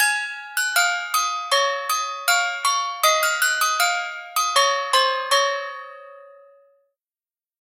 Deck The Halls - Christmas jingle played with bells
I recorded some bells I've got home and made this short Deck The Halls melody afterwards using those samples.
melody, music, song, bells, bell, deck-the-halls, holiday, jingle, music-box, deck-the-hall, xmas, spirit, christmas, intro, carol